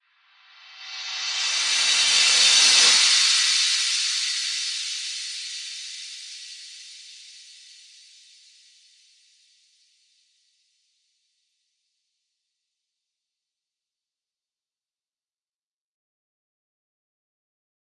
Rev Cymb 29 reverb
Reverse cymbals
Digital Zero